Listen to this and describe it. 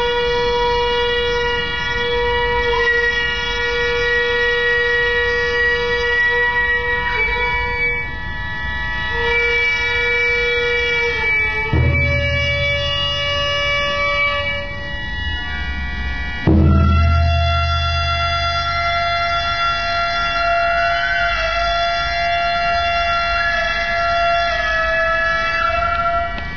Buddhist temple music at Sanbutsudoh Hall, Rinno-ji temple, Nikko
nikko, rinno-ji